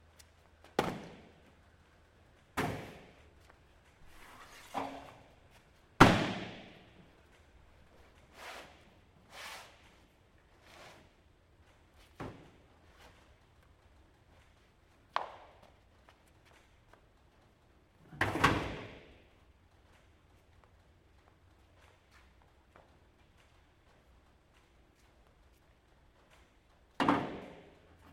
Loading Ute Flat Bed Truck in a large shed
Loading various items onto the metal flat bed of a ute or pick-up truck.
Alex Fitzwater/needle media 2017
bang, car, clang, equipment, garage, load, metal, metallic, packing, shed, stuff, tools, vehicle, warehouse